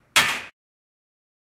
gun shoot
The sound of a gun being shot.
gun, shoot, shooting